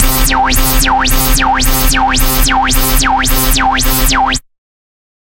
110 BPM, C Notes, Middle C, with a 1/4 wobble, half as Sine, half as Sawtooth descending, with random sounds and filters. Compressed a bit to give ti the full sound. Useful for games or music.
1-shot, processed, LFO, synthesizer, wobble, notes, porn-core, wah, electronic, synthetic, Industrial, digital, techno, bass, dubstep, synth